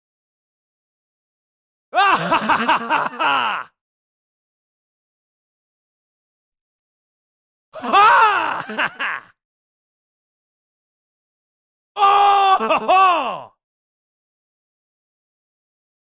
battle laugh
A male voice laughing as he attacks an enemy or leaps into battle. The defiant, angry laugh of a fighter.